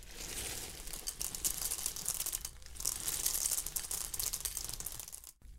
14.2-basura-cayendo
This is a foley of trash falling to the ground it was done with detergent on a bowl, this foley is for a college project.
foley, sand, drop, fall, trash